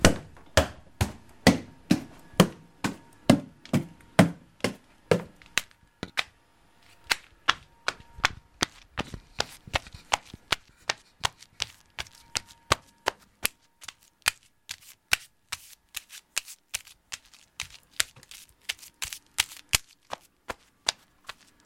Footsteps Walking on Wooden Floor Bare Feet 2
Walking on my bare feet. Recorded with Edirol R-1 & Sennheiser ME66.
foot, running, walk, steps, walking, run, footsteps, feet, bare